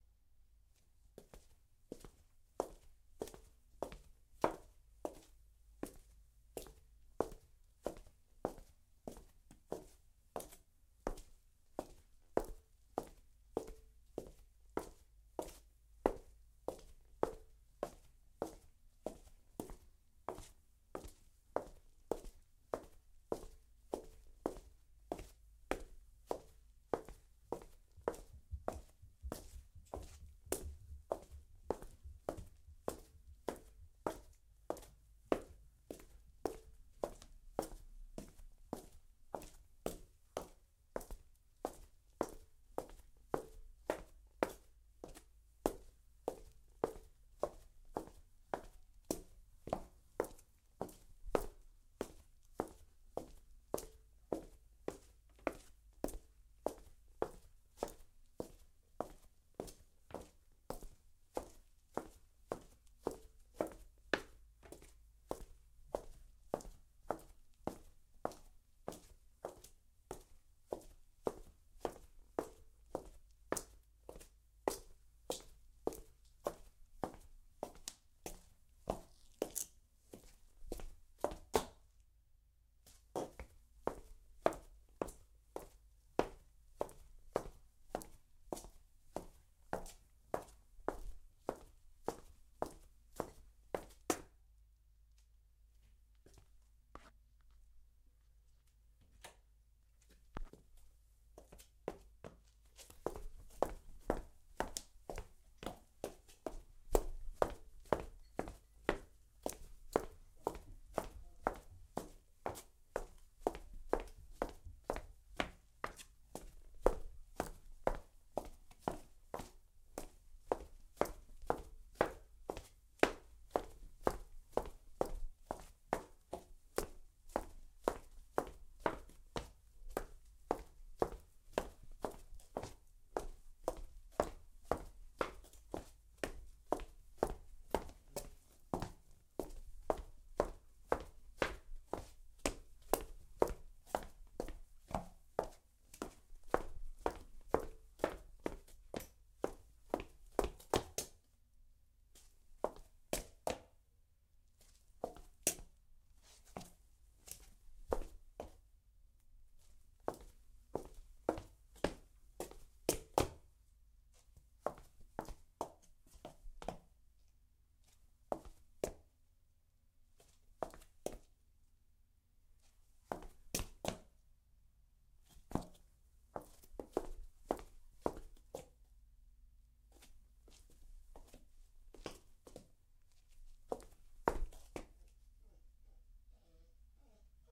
Footsteps one person CLEAN
One set of footsteps, wearing dress shoes (loud heel, but not necessarily high heel) in a quiet corridor. No external noise, low room tone. Also starts and stops. Good for adding reverb for an echoey feel.
walking, steps, walk, hallway, corridor, live-recording